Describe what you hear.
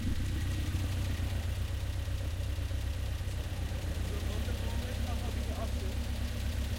Two different microphones used one underneath (shotgun) and one outside(condenser). Split the stereo file to get control over each's different quality.
Idle, Lotus